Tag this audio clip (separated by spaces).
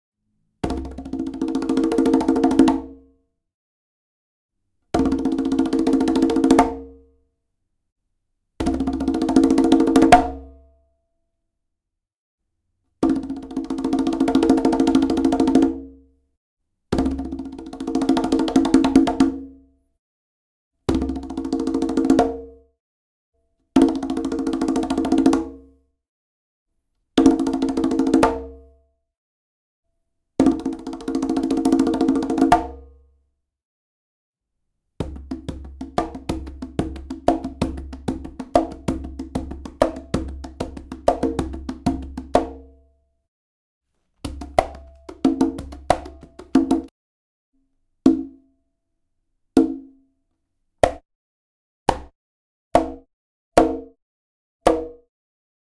drum percussion